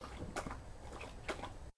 dog lapping water
recorded with my dsi, loaded into my computer through a line-in, cleaned up a bit in audacity. not great quality but im just starting off so deal with it :p
the dog is big and lazy so he drinks slowly, this will loop well with a bit of editing.
dog, drinking, lapping